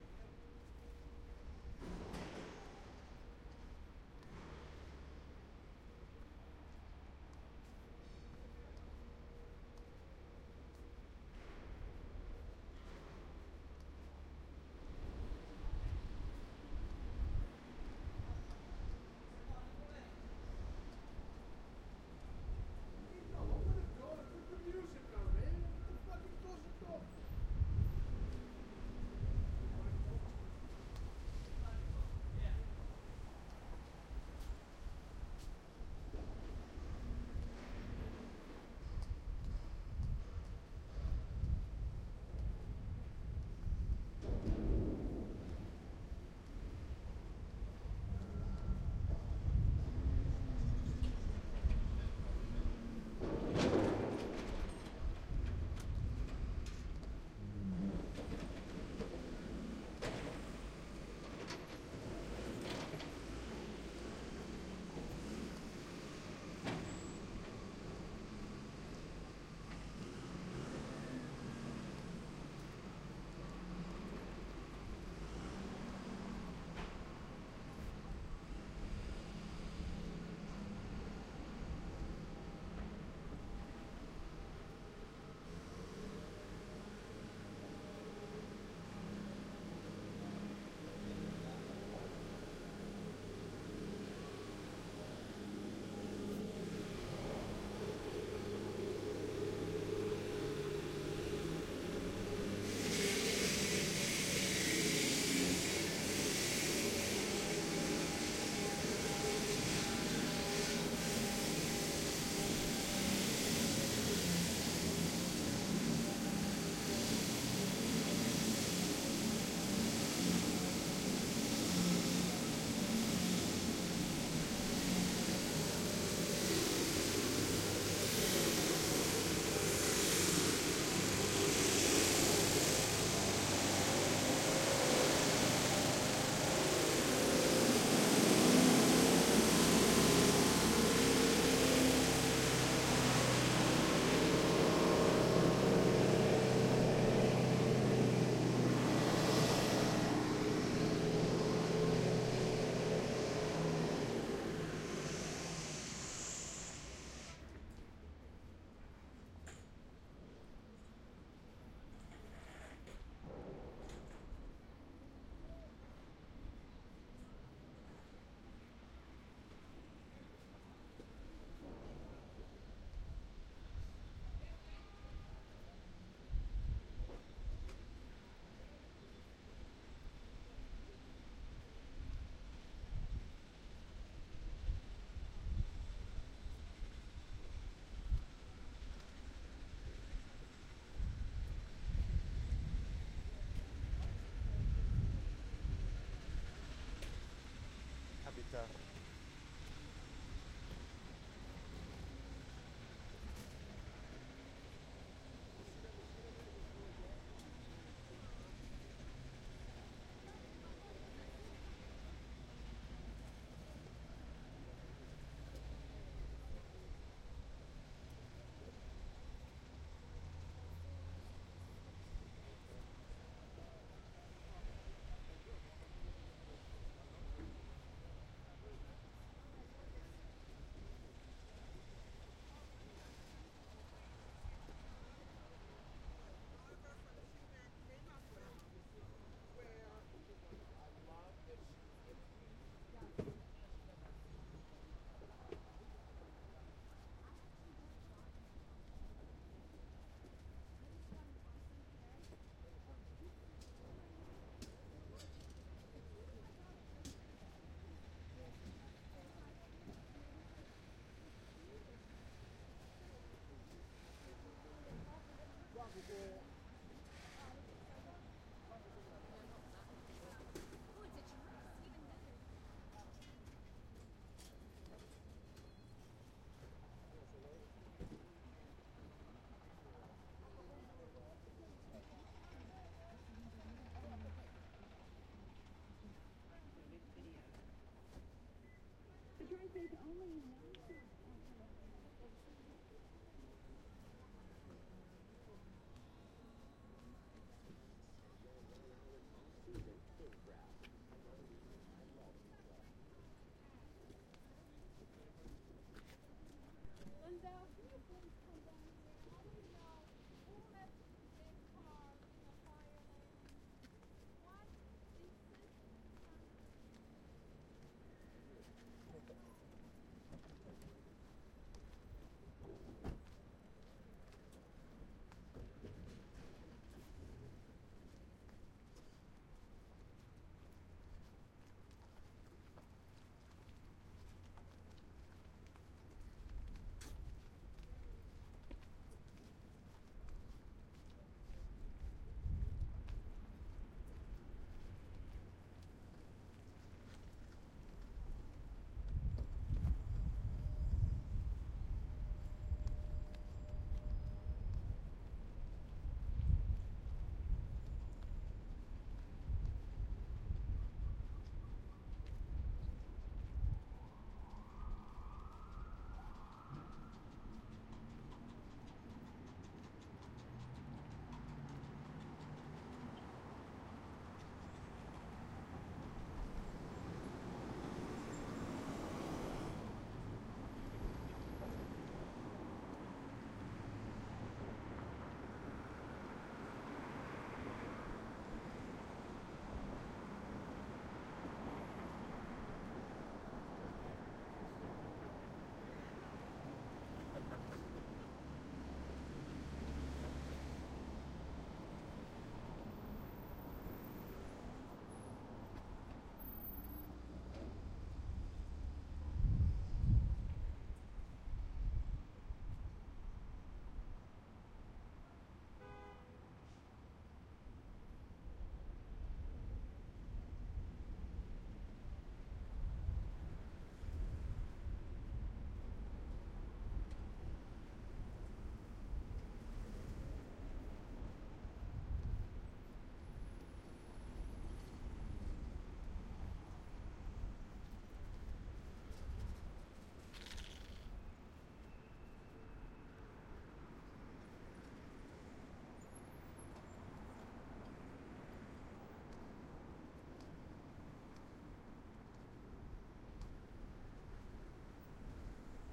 rear ST NYC street work
City, work, surround, New-York, pedestrian, quad, traffic, passbys, 4-channel